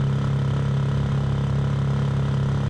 Motorbike Riding loop
IGNITION, start